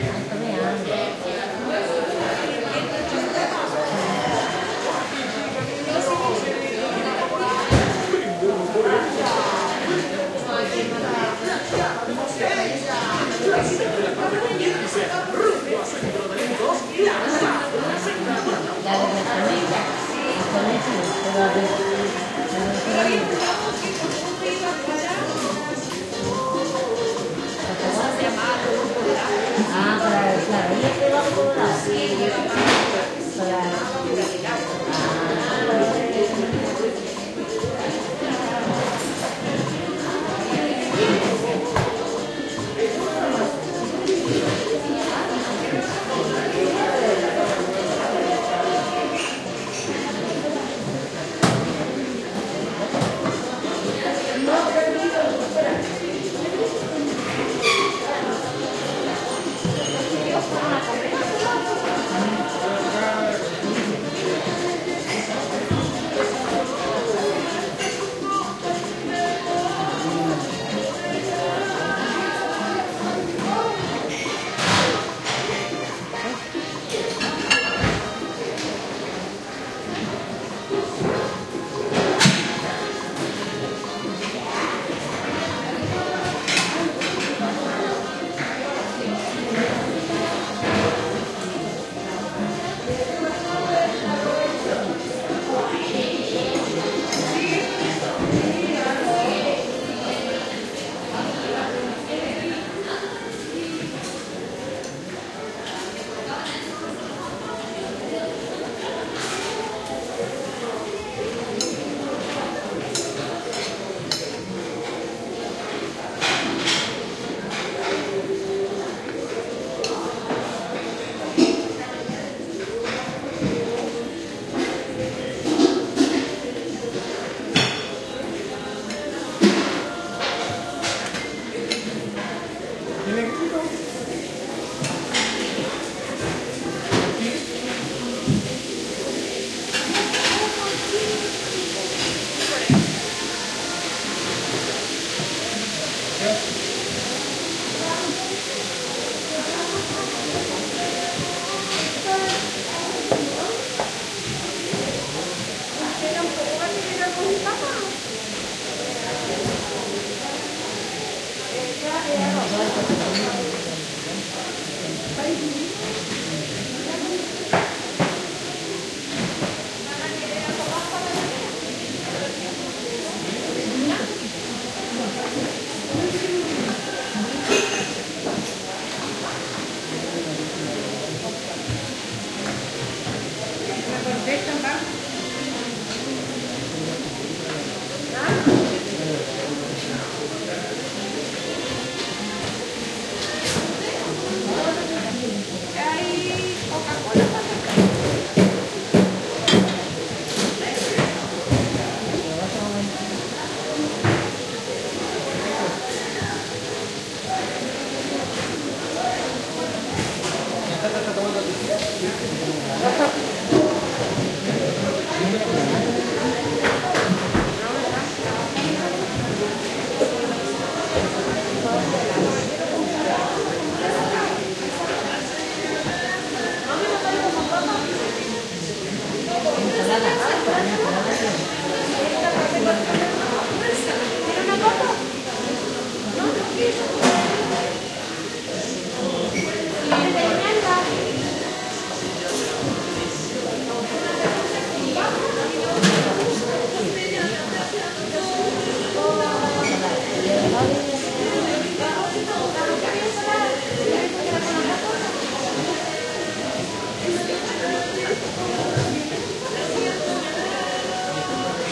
After lunchtime at Cocineria de Dalcahue
After lunchtime you can hear plates being washed, the local radio now stands out from a much reduced crowd. Few people eating.
Recorded on a MixPre6 with LOM Usi Pro microphones.
ambience, chiloe, cocineria, cutlery, dalcahue, food, mercado, restaurant, usi-pro